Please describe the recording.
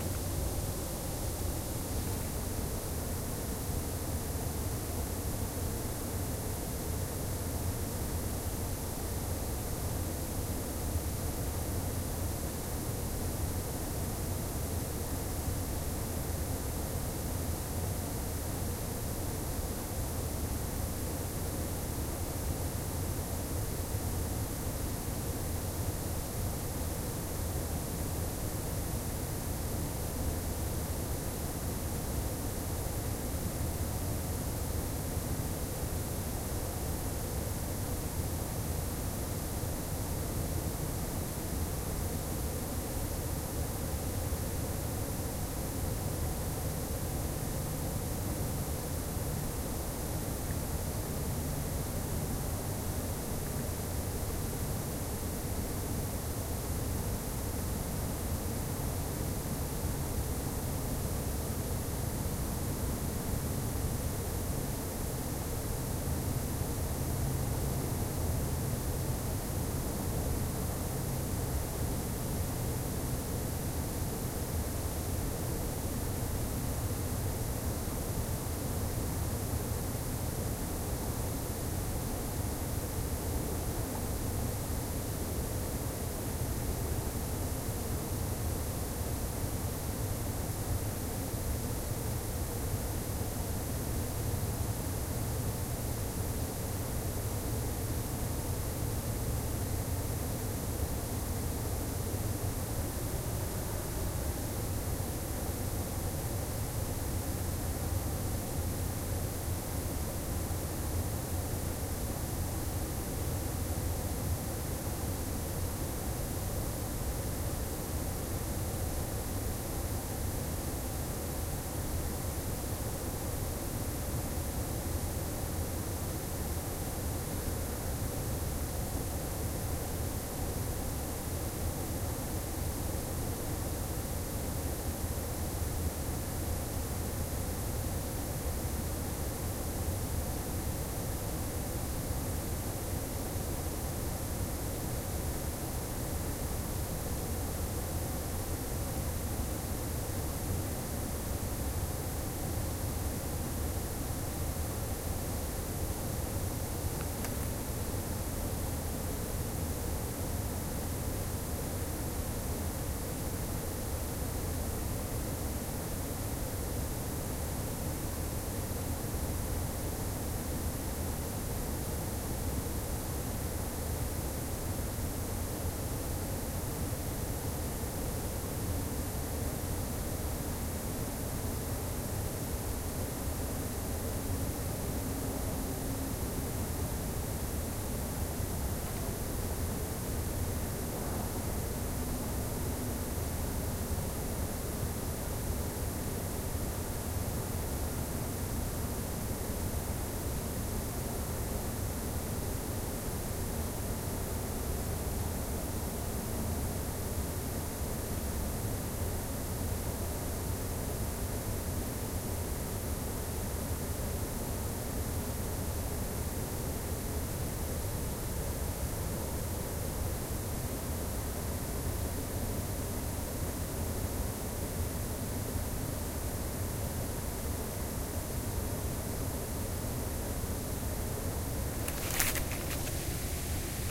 This is the sound of a Waterfall recorded for the Short Horror Film 'Out for Dinner'. It was recorded on location at Woodbank Memorial Park Stockport, England. Hope it comes in handy for you.